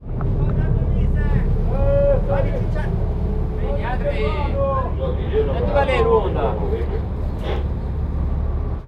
ferry crew shouts
The ferry boat is arriving at the port of Genova. The crew at the wharf exchanges some shouts with the crew on the boat.
boat, crew, ferry, genova, shouts